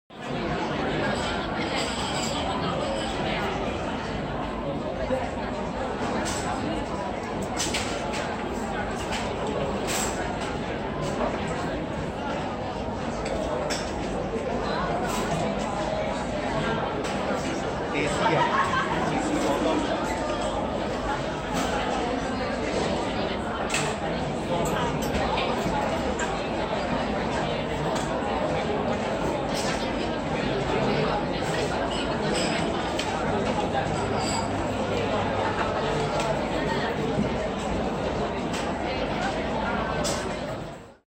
Singaporian Food court ambience
Recorded on a recent trip to Singapore in one of the many food courts there. This is an ambience of the food court with loads of people having their lunch.
hall, food-court, people, crowd, ambience, ambient, ambiance, singapore, chatting, food, noise